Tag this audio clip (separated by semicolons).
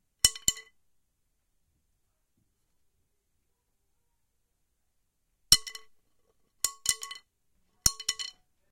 Stick; Wood